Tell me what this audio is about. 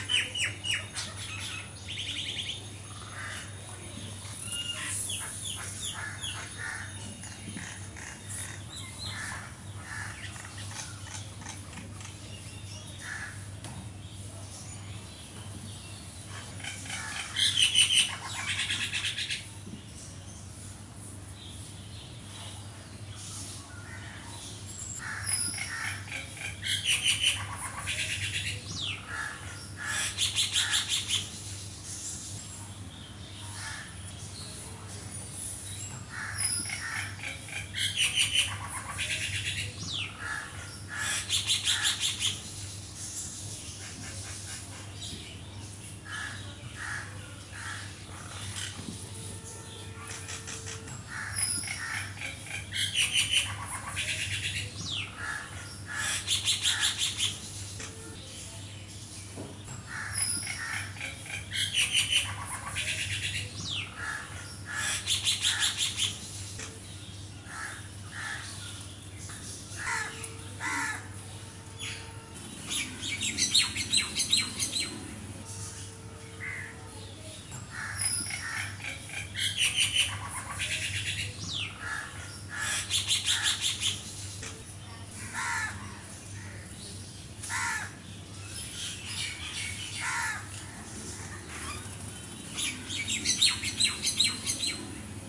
Birds of Bengal
west bengal's morning birds like indian myna & crow.
birds, crow, indian, myna, westbengal